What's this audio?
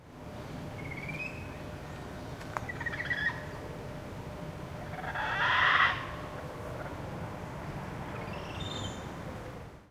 Recording of a Black Spider Monkey chattering and screaming. Recorded with a Zoom H2.